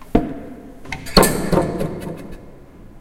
Low-mid frequencies sound obtained by recording a person standing up in a theory classroom. In Roc Boronat Building at Campus Poblenou (UPF)
campus-upf, noise, seat, standup, UPF-CS13